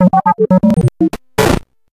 Some melodic and clicky rhythmic notes. Created with a Nord Modular making FM feedback processed through a gate and other manipulations.
nord, bloop, fm, funny, beep, notes, rhythm, loop, space